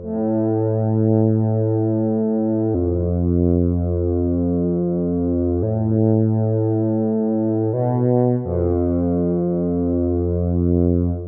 Synth Drone 001
Droning synth sequence that sounds a bit like a foghorn - may have blips at the end requiring fade out processing.